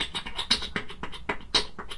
I recorded myself beatboxing with my Zoom H1 in my bathroom (for extra bass)
This is a beatbox emulation of a rhythmic scratch. Loops at 120bpm but not perfectly.